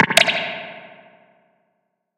spring reverb 1
a dark and distorted percussion sound run through a spring reverb setting
hit, reverb, spring, reverberation, dark, sound, ambient, wave